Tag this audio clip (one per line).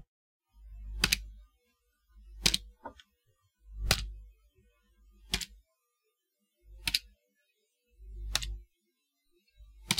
Buttons
Keyboard
Tapping